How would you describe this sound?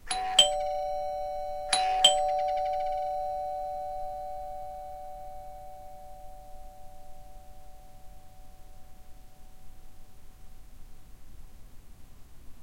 A doorbell that is ringing.
doorbell, bell